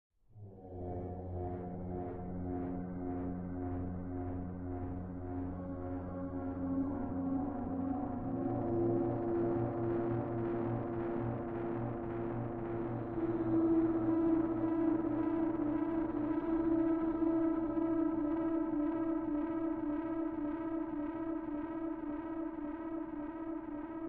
More ambient ghost sounds